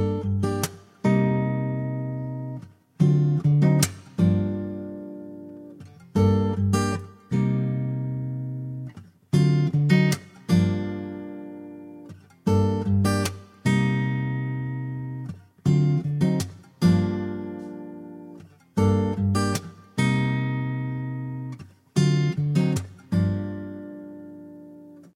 Acoustic Guitar 76bpm Gm
chill, loop, lo-fi, lofi, real, acoustic, guitar, smooth